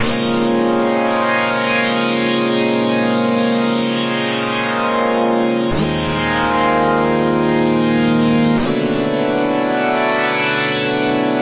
Jungle Pad
Stay Frosty :3
DnB, Jungle, Loop, Pad, Synth